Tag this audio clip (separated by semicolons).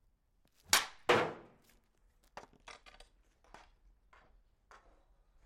hit; impact; metal; slap-shot